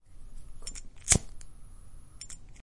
Cigarette lighter
A lighter being sparked for a flame.